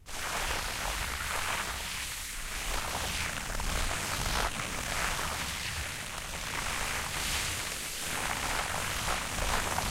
rustle.Carpet Scratch 2
recordings of various rustling sounds with a stereo Audio Technica 853A
screatch, carpet, noise, rub, scratch